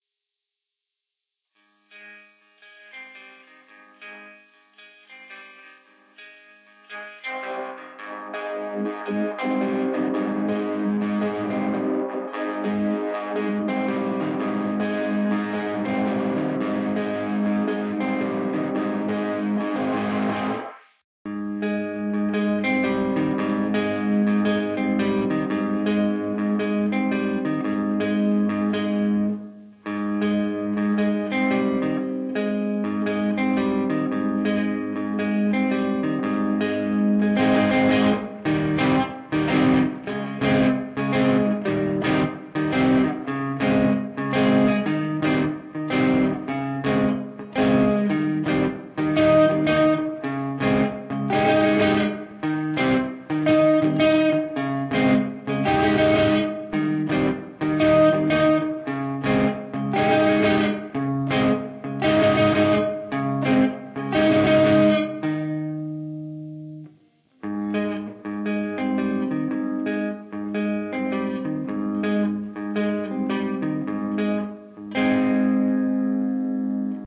melody of mine
Recorded rhythm part. Could be used as background music, or in your composition if you want so. I'm sorry for that quality and some little noises
electric, guitar, indie